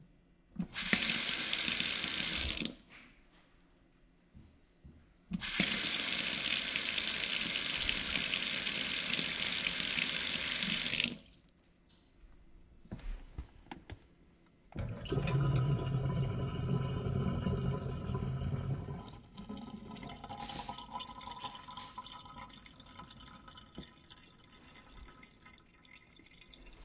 Tap Faucet Sink Drain Plug
Tap/faucet turning on and off and having the plug pulled.
sink, dishes, water, plus, faucet, tap, drain